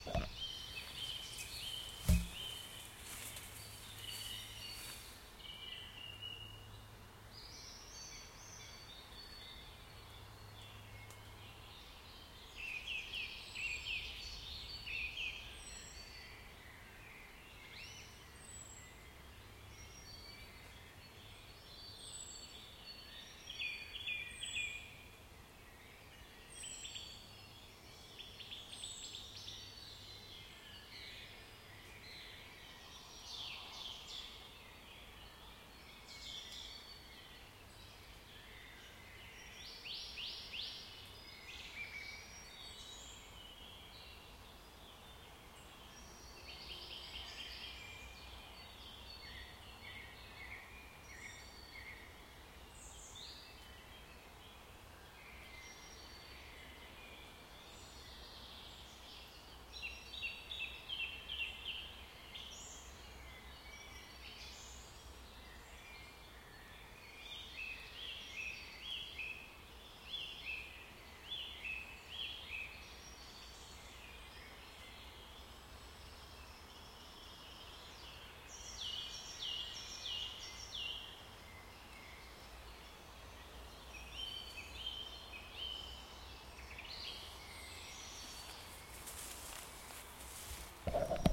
forest-birds3a
Surround sound: ambient background noise from a central european forest, mainly birds, rear channels